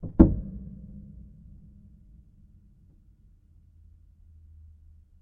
Upright Piano Noise 05 [RAW]
Some raw and dirty random samples of a small, out of tune Yamaha Pianino (upright piano) at a friends flat.
There's noise of my laptop and there even might be some traffic noise in the background.
Also no string scratching etc. in this pack.
Nevertheless I thought it might be better to share the samples, than to have them just rot on a drive.
I suggest throwing them into your software or hardware sampler of choice, manipulate them and listen what you come up with.
Cut in ocenaudio.
No noise-reduction or other processing has been applied.
Enjoy ;-)
recording, noise